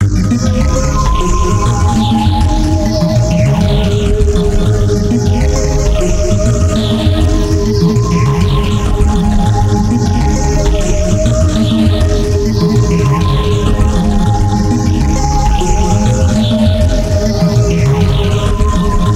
A sequence I made on accident and thought it sounded like an anxiety trip or bad dream.
Edmond